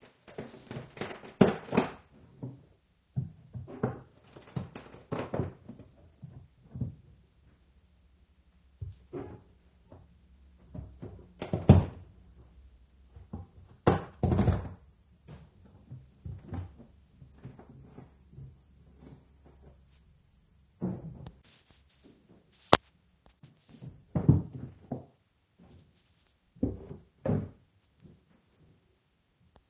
Recorded during inhouse construction work with H2N, no editing.